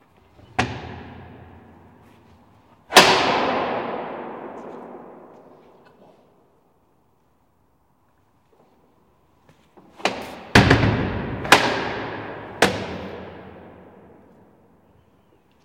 Cell door
Sounds recorded from a prision.